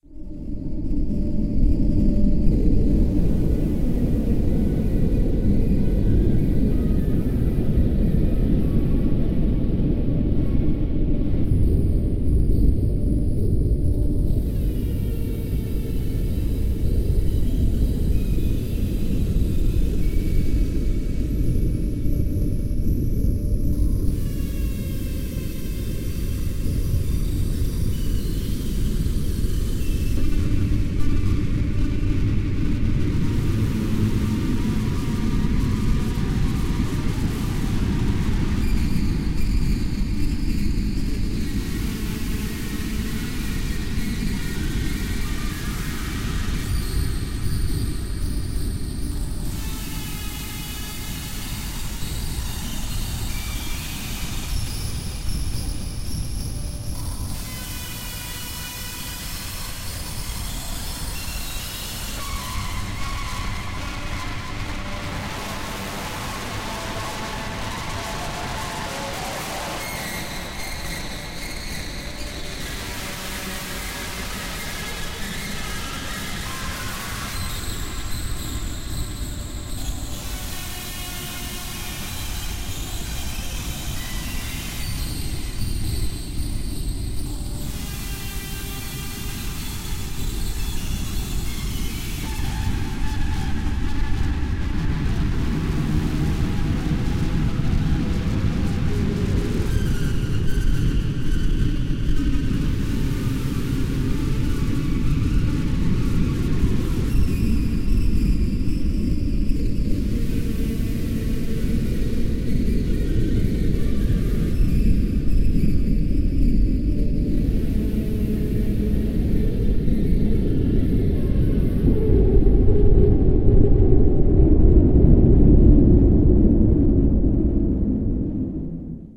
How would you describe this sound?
A long, creepy dark ambience that can loop. Suitable for tense or creepy environments in games or films. Made in FL Studio using Absynth 5.